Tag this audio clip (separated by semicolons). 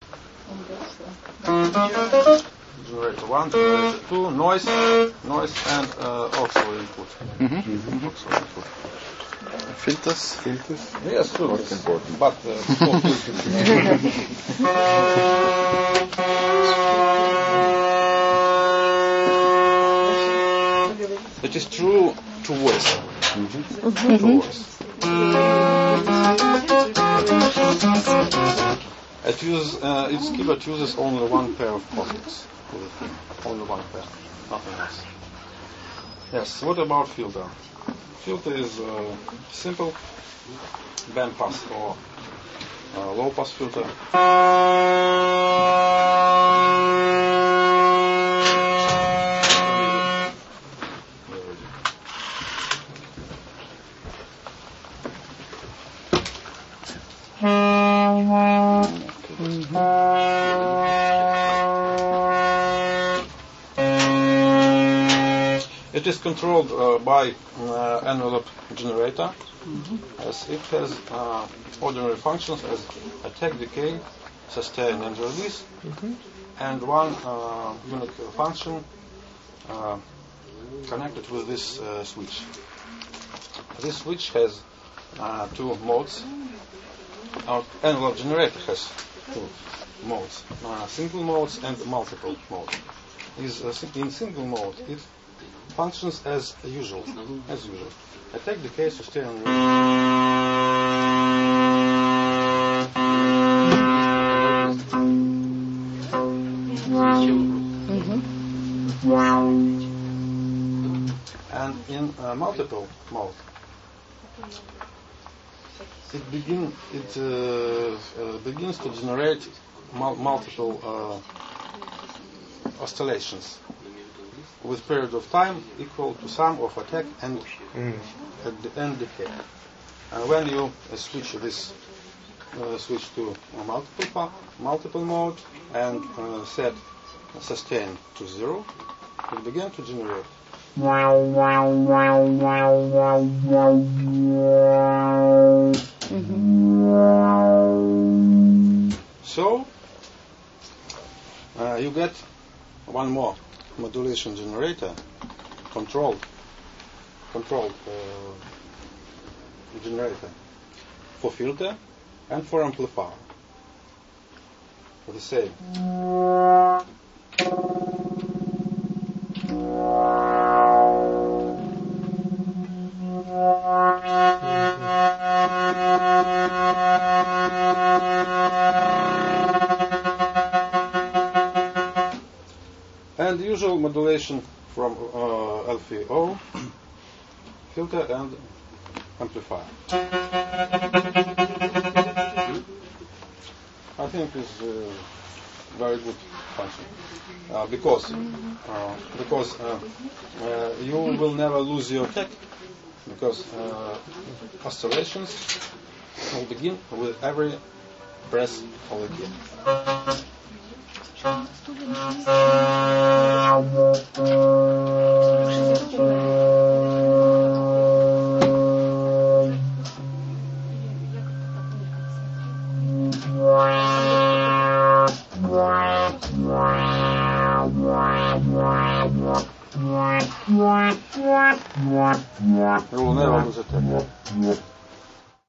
polivoks; yekaterinburg